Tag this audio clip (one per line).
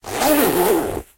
undress
coat
closing
close
unzip
zipper
zip
jacket
unzipping
jeans
clothing
backpack
zipping
opening
bag